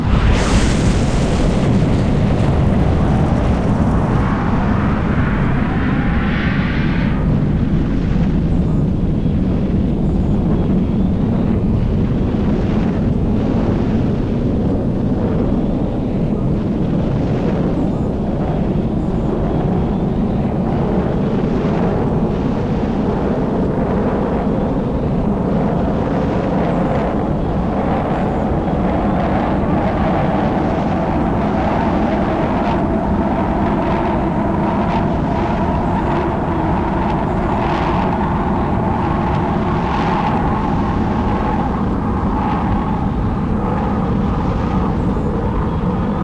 ROCKET START UP
It starts off with the rocket "Liftoff" and turns into a deep rocket engine sound
bass, up, rocket, explode, ambiance, launch, missle, boom